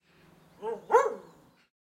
13-Dog barlking
Huge dog is barking
CZ, Pansk, Czech, Panska